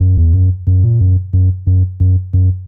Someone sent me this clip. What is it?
90 Subatomik Bassline 05
fresh rumblin basslines-good for lofi hiphop
hiphop, loop, free, sound, bassline, series, grungy, electro